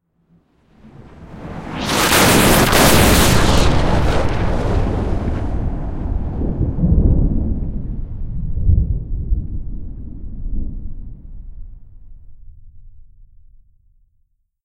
A high-energy, electric-sounding explosion. It might be a plasma charge, an antimatter bomb, a broken warp drive, or something else.
alien, antimatter, bomb, electric, explosion, plasma, radiation, sci-fi